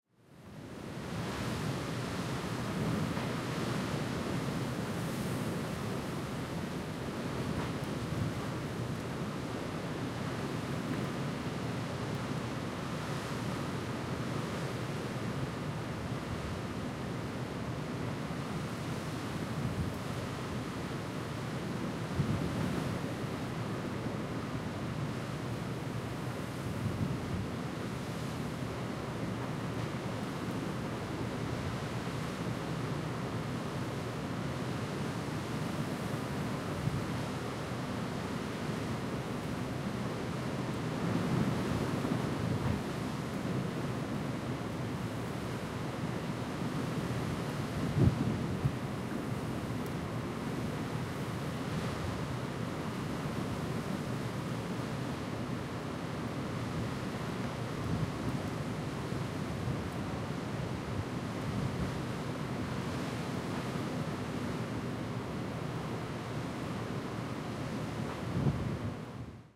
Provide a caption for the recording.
Wind, sea and boat engine. Recorded with a Zoom H1.